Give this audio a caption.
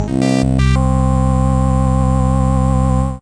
PPG 010 Little Mad Dance E3
This sample is part of the "PPG
MULTISAMPLE 010 Little Mad Dance" sample pack. It is a digital sound
with a melodic element in it and some wild variations when changing
from pitch across the keyboard. Especially the higher notes on the
keyboard have some harsh digital distortion. In the sample pack there
are 16 samples evenly spread across 5 octaves (C1 till C6). The note in
the sample name (C, E or G#) does not indicate the pitch of the sound
but the key on my keyboard. The sound was created on the PPG VSTi. After that normalising and fades where applied within Cubase SX.
digital, experimental, harsh, melody, multisample, ppg